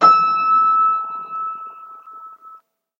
88 piano keys, long natural reverb: up to 13 seconds per note
THIS IS ME GIVING BACK
You guys saved my bacon back in the day. Recently I searched for free piano notes for a game I'm making, but the only ones I could find ended too quickly. I need long reverb! Luckily I have an old piano, so I made my own. So this is me giving back.
THIS IS AN OLD PIANO!!!
We had the piano tuned a year ago, but it is well over 60 years old, so be warned! These notes have character! If you want perfect tone, either edit them individually, generate something artificially, or buy a professional set. But if you want a piano with personality, this is for you. being an old piano, it only has 85 keys. So I created the highest 3 notes by speeding up previous notes, to make the modern standard 88 keys.
HOW THE NOTES WERE CREATED
The notes are created on an old (well over 50 years) Steinhoff upright piano. It only has 85 keys, so I faked the highest 3 keys by taking previous keys and changing their pitch.
sustain, keys, old, notes, piano, reverb, complete